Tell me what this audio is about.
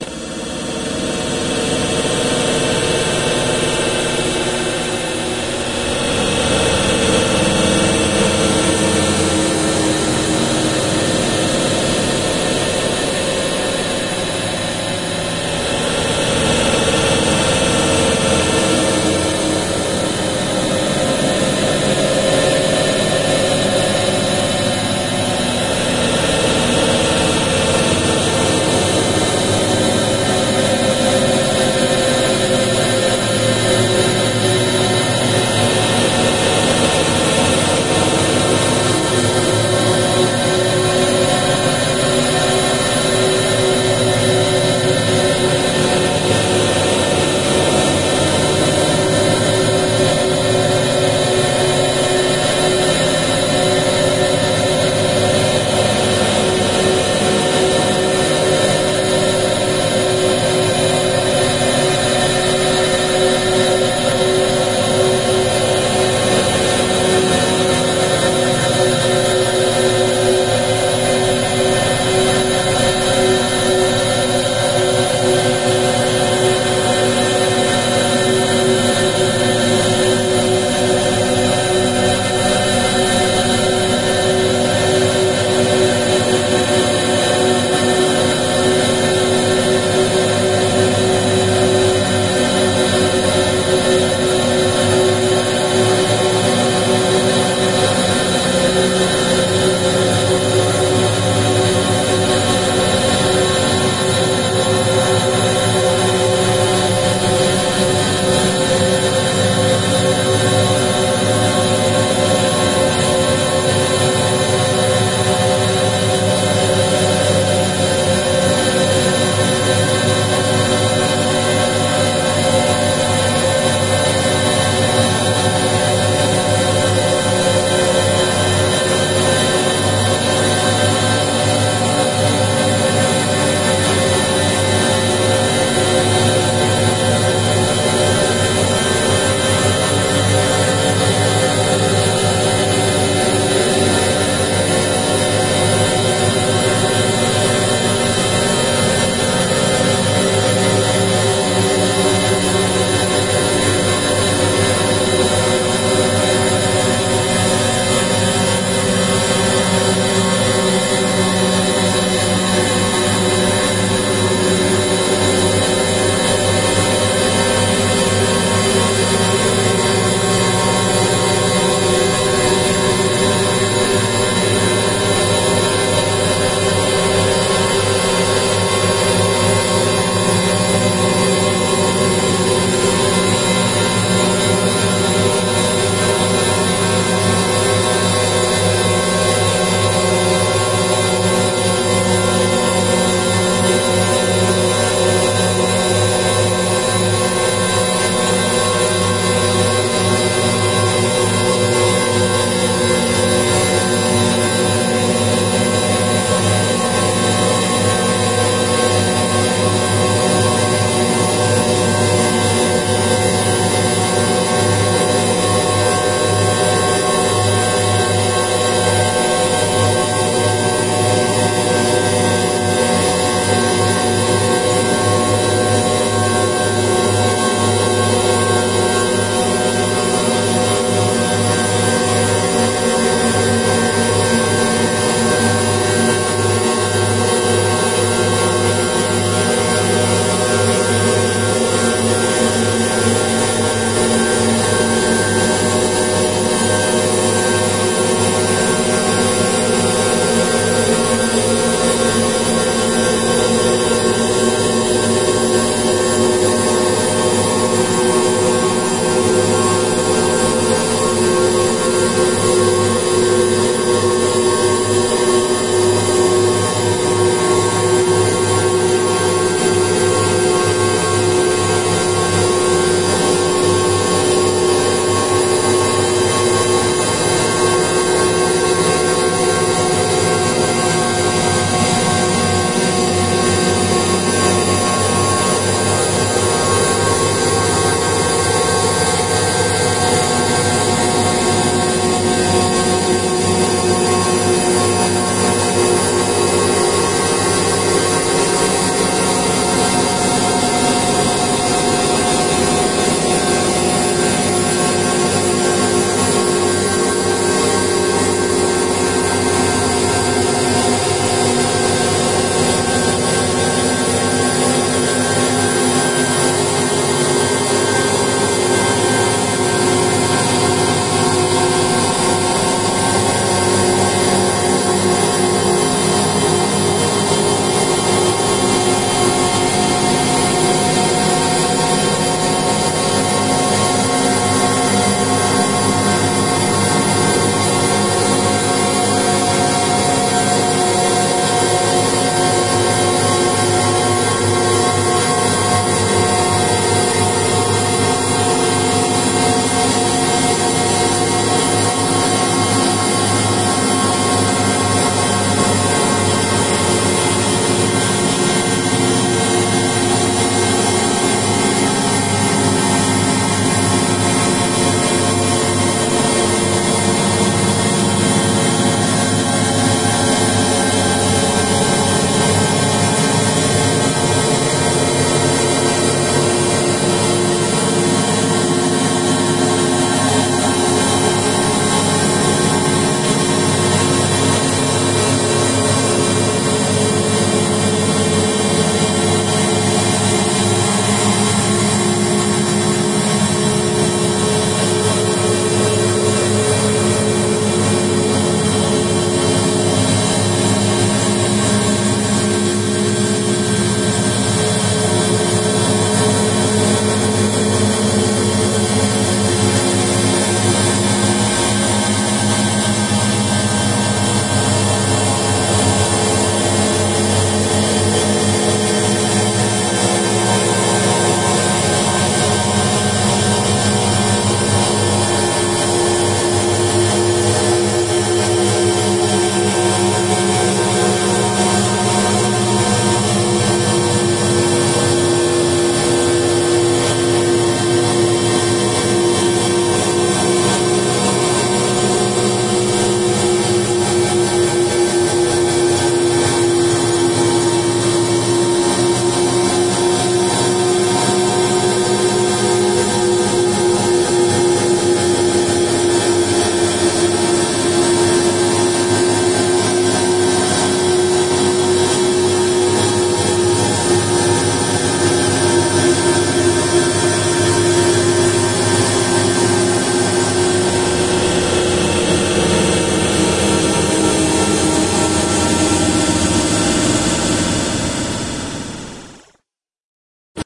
long drawn out
this is the last sound of the recorder being shut off, stretched out like 1000 x